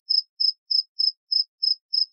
Cricket - Grillo
Cricket at night.